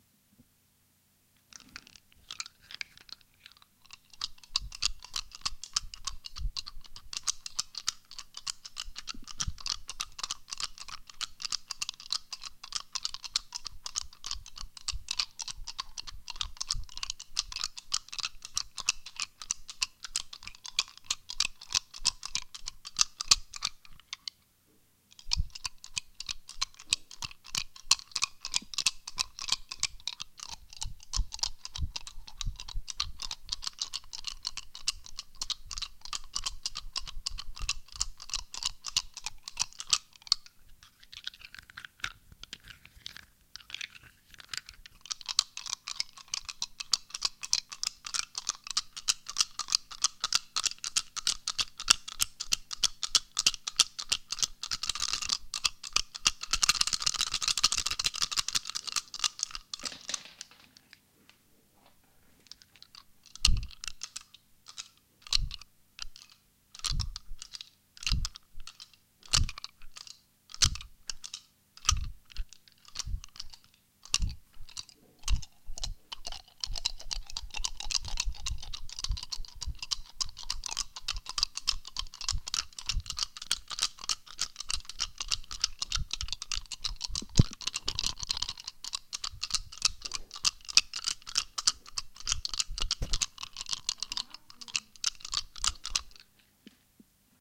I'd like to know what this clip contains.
Nutshake DRY
This is me shaking 5 hazelnuts in my hands. Sounds a bit like dice. There is a processed version of this raw recording as well.
hand, nut, percussion, hazelnut, dry, hands, dice, nuts, shake